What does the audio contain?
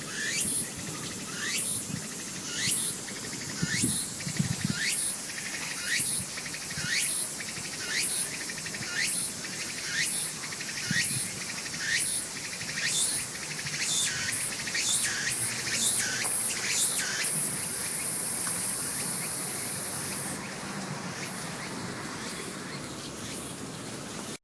セミ semi@遊行寺2012
Recording at Japanese Temple 2012.
Recoder : iPhone4